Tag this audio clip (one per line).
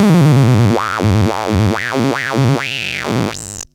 sound
hardware
japan
electronic
sx-150
kit
synth
gakken
toy
analog
noise